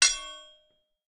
The sound of what I imagine a hammer striking an anvil would probably make. This was created by hitting two knives together and resampling it for a lower pitch.